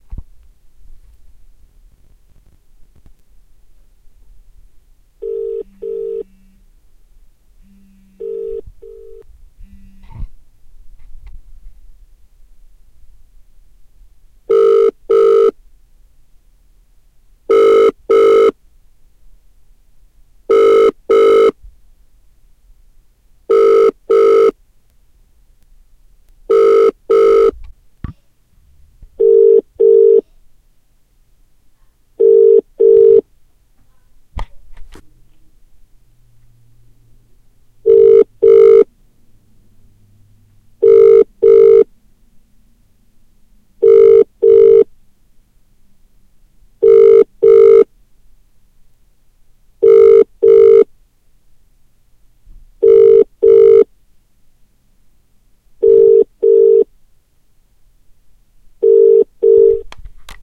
Phone Ringing Tone
Short uk ringing tone recorded from a mobile phone